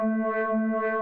Here is a sub bass sample generated in SC
bass, low, sub, supercollider, wobble